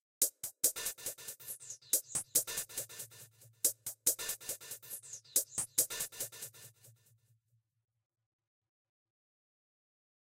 tap ass140
hat loop with fx